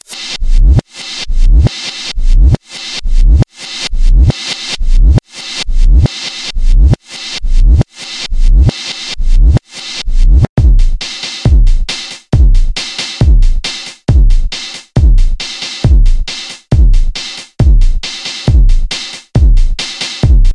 Energy Beat 3000 BOTH
Beat Rev And Nice , Goinn good
Acid, Byt, Main, Nice